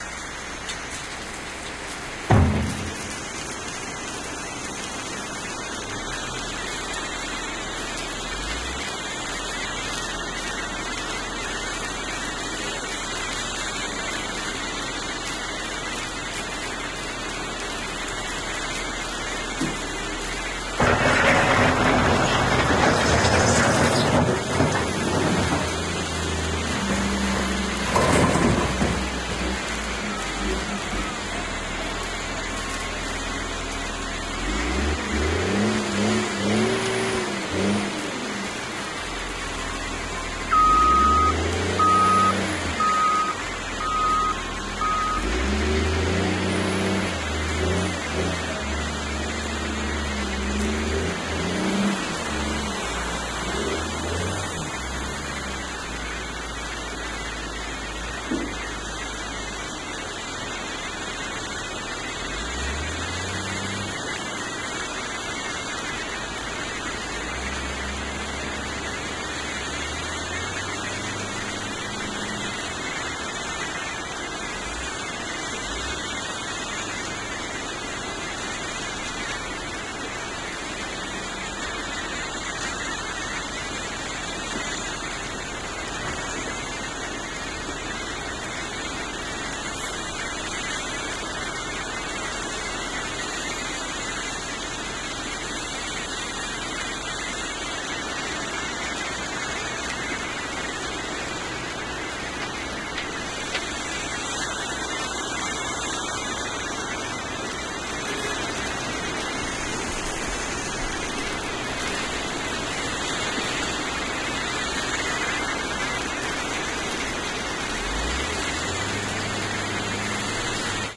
quarry soundscape
this is the soundscape of the quarry site. It' s interesting to listen to the 5 other members of the pack. They're all confined in the same geottaged area, the quarry on river Sabac near Belgrade Serbia. Recorded with Schoeps M/S mikes during the shooting of Nicolas Wagnières's movie "Tranzit". Converted to L/R
noise, soundmark, tranzit, belgrade, river, industry, serbia, field-recording, soundscape